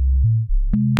bassline with clicks e c120bpm